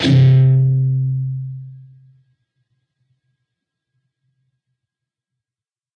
A (5th) string 3rd fret, and D (4th) string 2nd fret. Up strum. Palm mute.